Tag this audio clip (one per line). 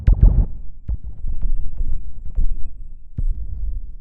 alien
bwah
design
laser
pop